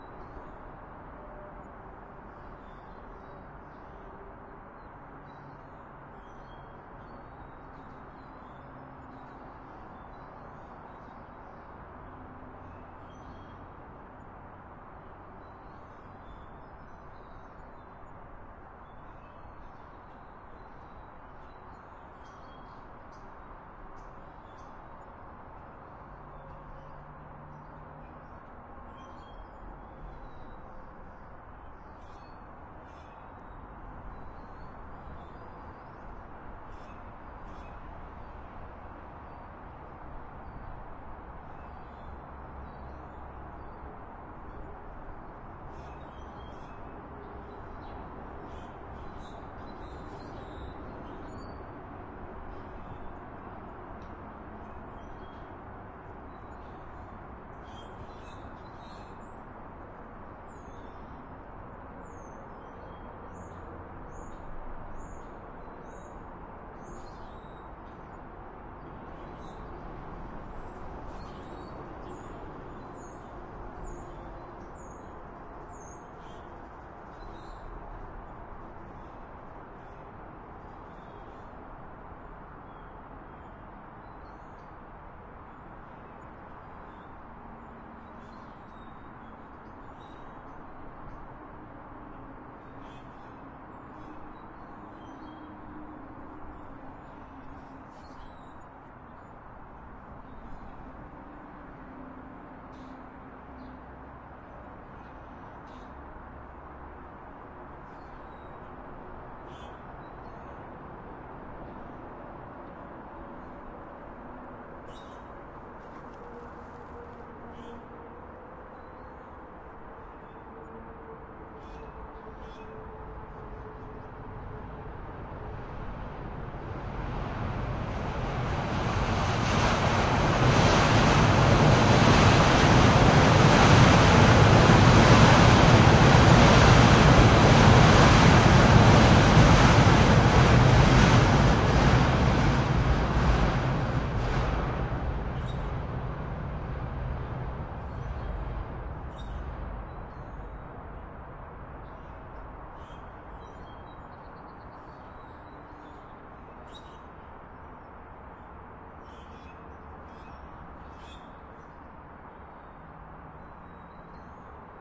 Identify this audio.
DVP Subway Pass and Distant Traffic 3
bys, subway, parkway, toronto, traffic, bridge, don, pass, under, valley